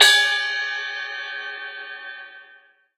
This Ting was recorded by myself with my mobilephone in New York.